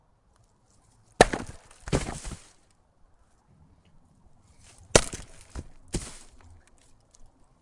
Chopping Wood 01
Chopping up some logs of hardwood with a heavy splitting ax.